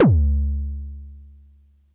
Arturia Drumbrute Analogue Drums sampled and compressed through Joe Meek C2 Optical Compressor
Analogue, Drumbrute, Drum-Machine